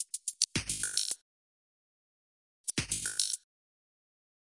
minimal rhythm
rhythm, techno